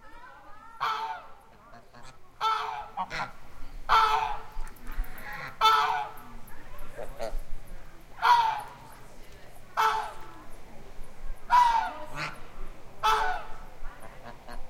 cibolo geese08
Geese honking at Cibolo Creek Ranch in west Texas.